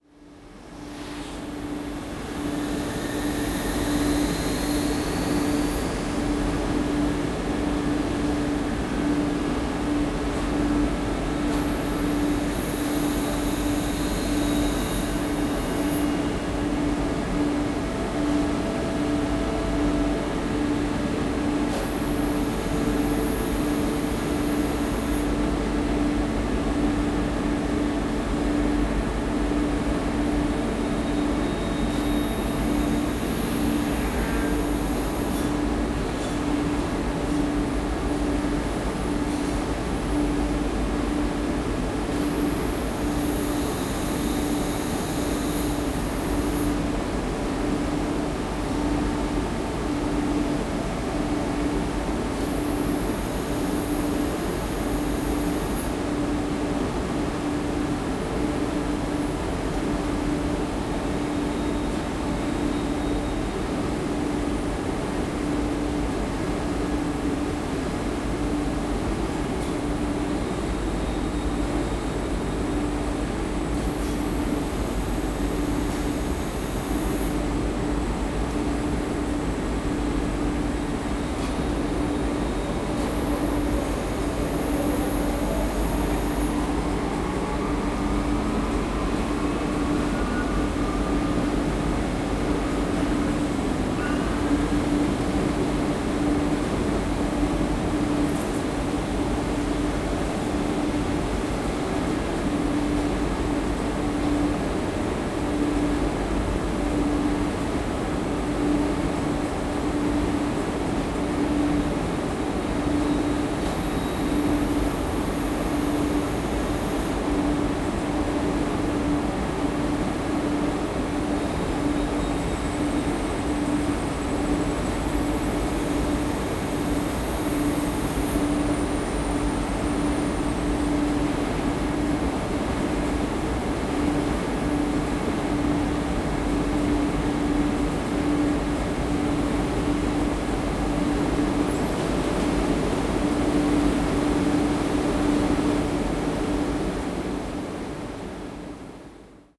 Field recording of an industrial laundry. Noisy work environment, periodic pumping sound. No human. Recorded in Pantin, france with a Zoom h2n in stereo mode.